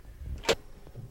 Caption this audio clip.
I recorded this sound back in 2002. Button from turning something on.